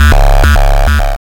an annoying bitcrushed alarm sound, for your pleasure